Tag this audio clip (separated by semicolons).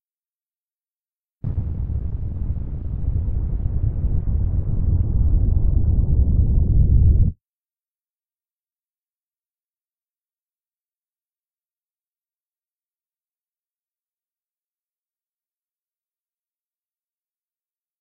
aire; ambiente; avion; espacio